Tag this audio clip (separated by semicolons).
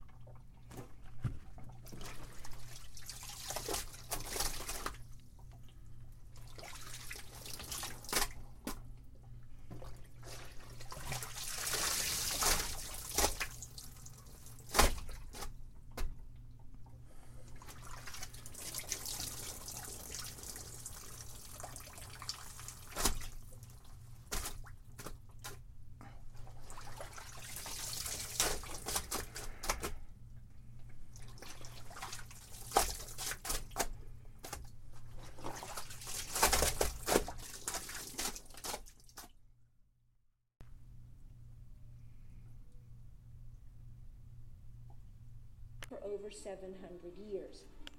spatter; squelch; wet; squish; splatter